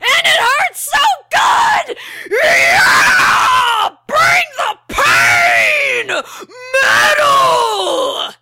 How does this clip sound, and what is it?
it hurts so good
WARNING: SUPER LOUD
okay, so I was using baking soda on my face to clear out blackheads, but I had a few scabs from my dermatillomania, and I was making a video where I mentioned it, and shouted this. it's REALLY gritting your teeth and embracing the pain. it's so metal. XD
hurt, shouting, yell, scream, metal, pain